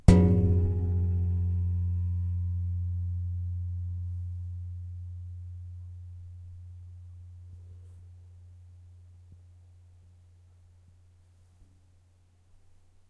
Nagra ARES BB+ & 2 Schoeps CMC 5U 2011
A small chinese cymbal hit with hand, very close.
chinese, cymbal, resonance, percussion